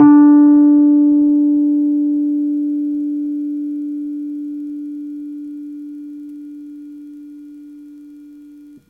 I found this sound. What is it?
just the single note. no effect.